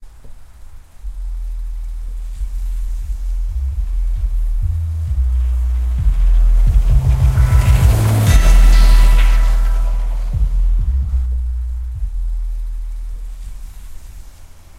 Car drive by with bass
request from jarreausauce.
Samples used:
Song clip "PAPER" by "The Beat Channel"
bass, music, car, loud, rattling, drive, by